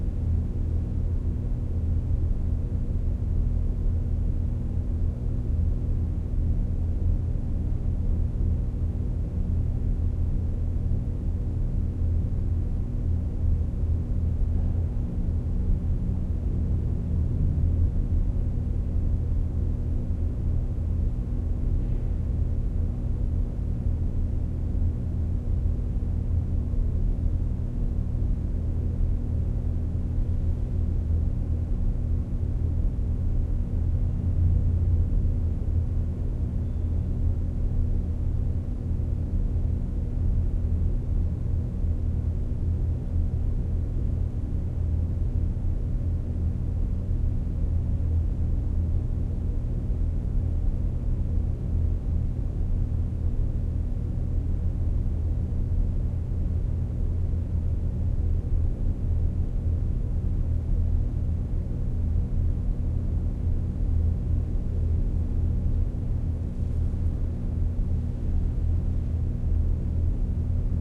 tunnel moody hum drone
moody, hum